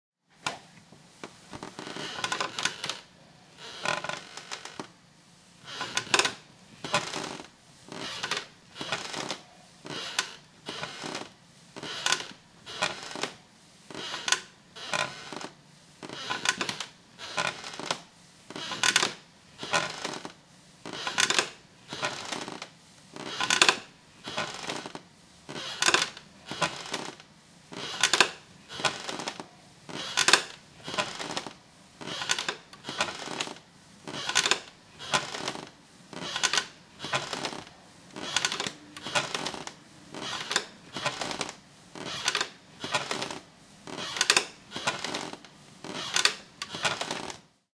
An old rocking chair in movement on the wooden floor of an apartment in Montreal.